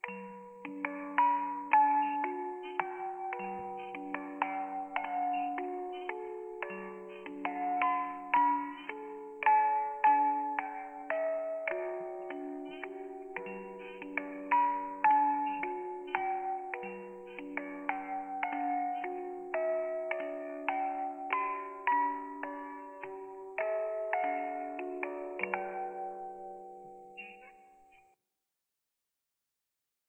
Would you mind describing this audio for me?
It's a mix of beautiful, relaxing, creepy, and ominous to me. YMMV.
Anyway, what you could use this for:
-BGM
-a music box sound
-come up with your own idea. I've ran out.